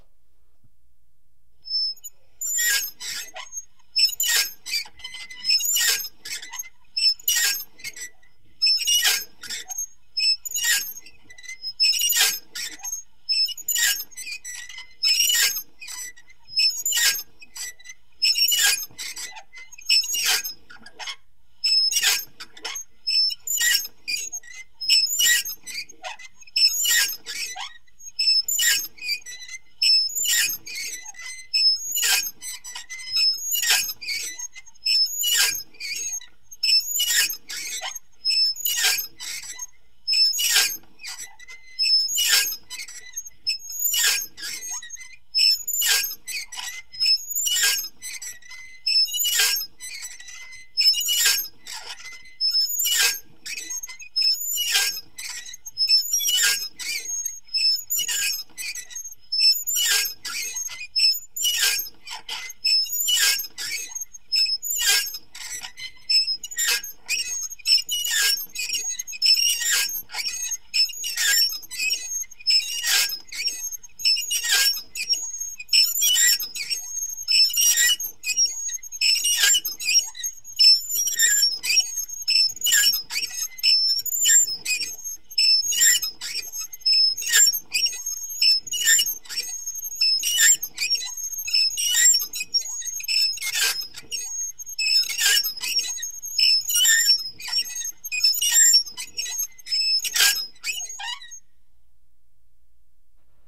Meat Grinder09M
I recorded these sounds made with a toy meat grinder to simulate a windmill sound in an experimental film I worked on called Thin Ice.Here is some medium speed squeaking.
squeaking, metal, recorded, 416, using, mono, toy, dat